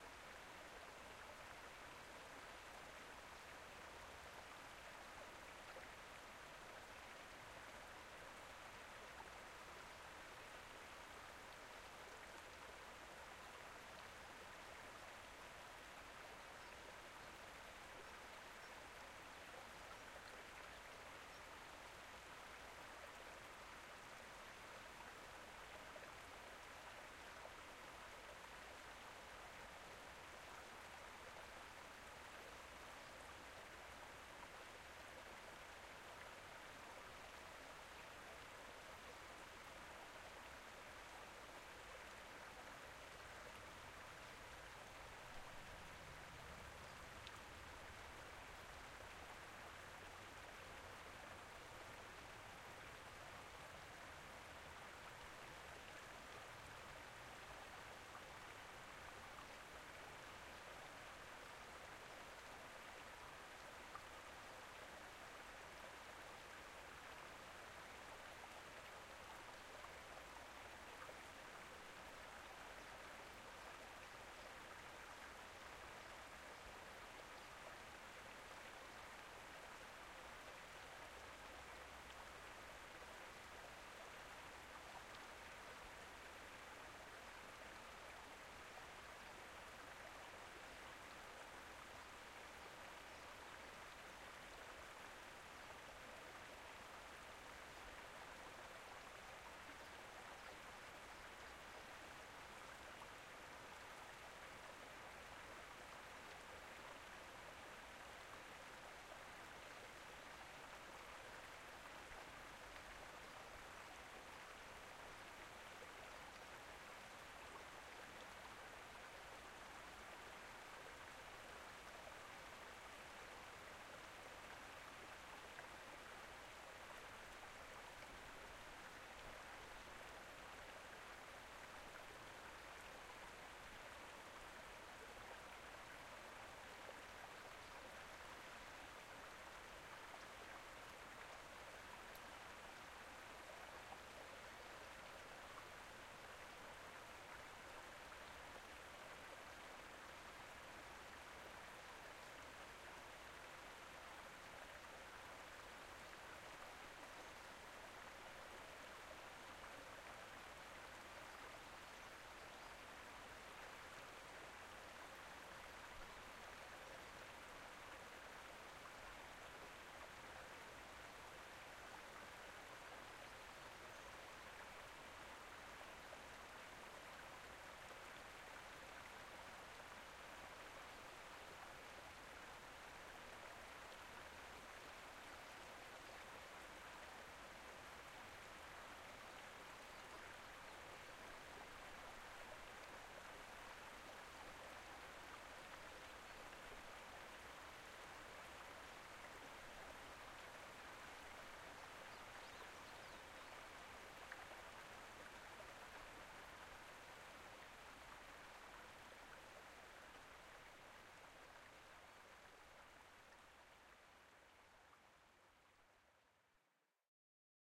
Ambience by a pond, with birds and nature surrounding.